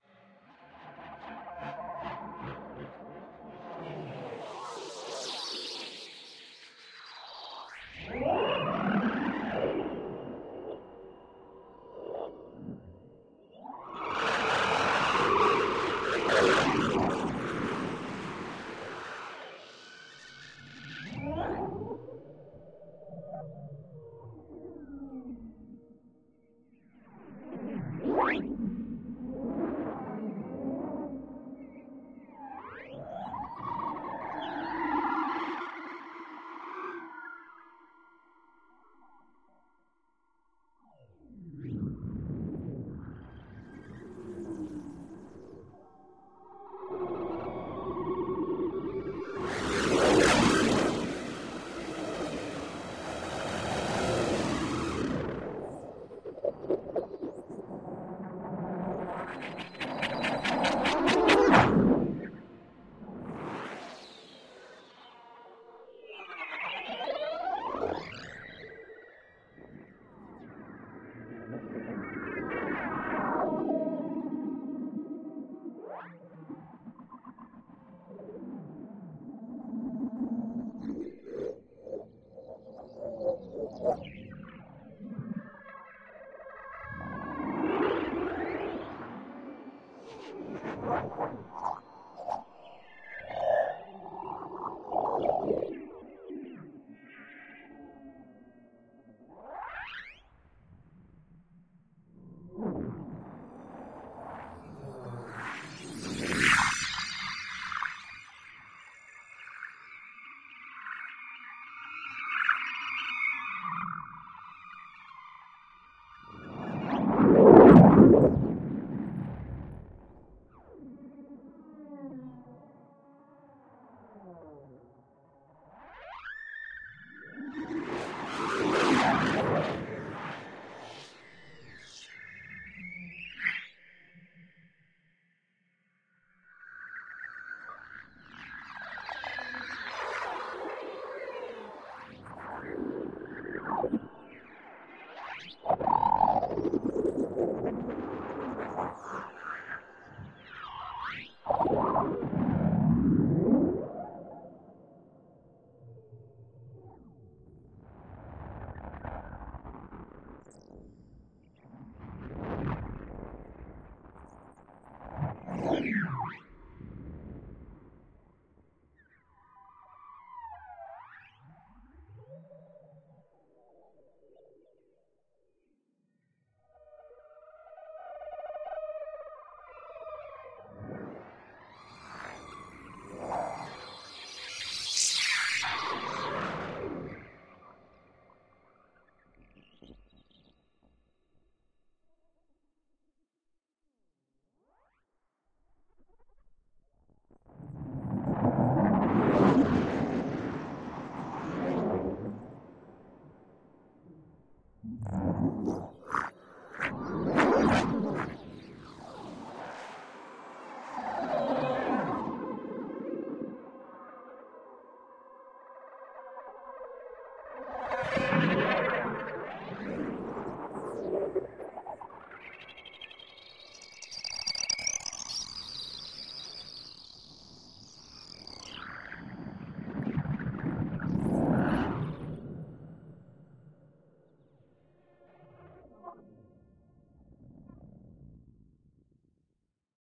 ESERBEZE Granular scape 41
16.This sample is part of the "ESERBEZE Granular scape pack 3" sample pack. 4 minutes of weird granular space ambiance. A space symphony.
drone, effect, electronic, granular, reaktor, soundscape, space